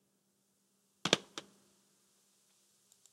dhunhero slammouse3
Another take of the mouse slam. This description is too short :P